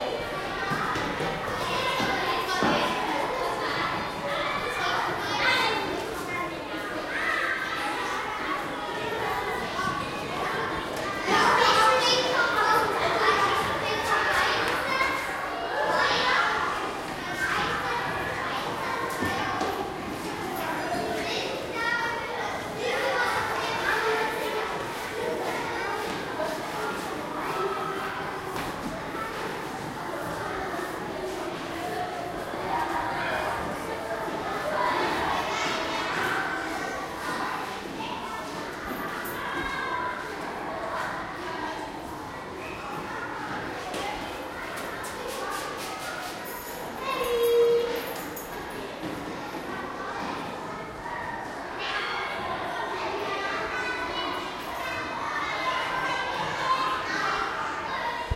school ambience
I've recorded a school building during a break. Children are going up and down the stairs.
children, field-recording, school